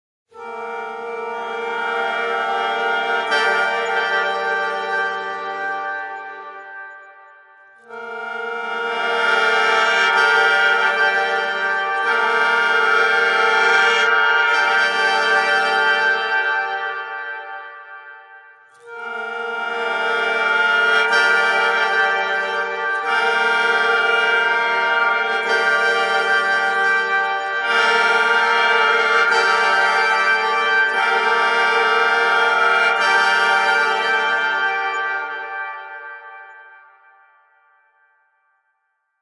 Khaen Symphony
Created by Kimathi Moore for use in the Make Noise Morphagene.
“The sounds I've tried sound very good for the Morphagene i hope, and are very personal to me. That was bound to happen, they're now like new creatures to me, listening to them over and over again has made them very endearing to me. I also added my frame drums which I thought would be a good addition, sound tools, heater, Julie Gillum's woodstove, and a small minimalist piano composition.. In addition to the roster 2 of them are from Liz Lang, whom I wanted to include here as she was my sound/composition mentor.”